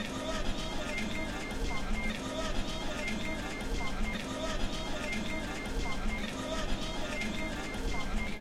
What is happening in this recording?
phone loop 4 rambla
loop of phone ringing with melody
4, loop, phone, rambla